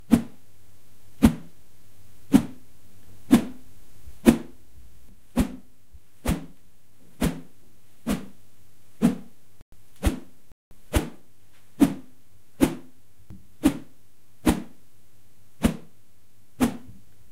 A few different woosh Sounds.
Can be used as a transition sound.
Recorder: Zoom H4n (stereo)(no post processing)
whip, swosh, cut, bamboo, wind, swhish, woosh, transition, swoosh, swash, punch, luft, stick, stereo, air, zoomh4n, slide, wisch, wish, whoosh